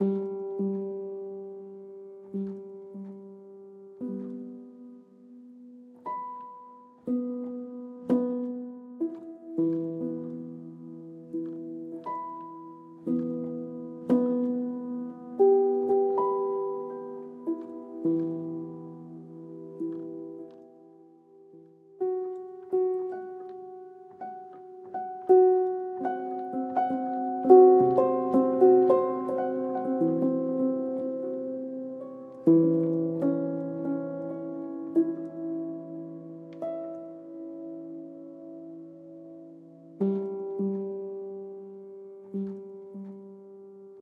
Night relax - piano mood atmo